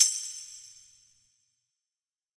Tambourine Lo
drumpad, studio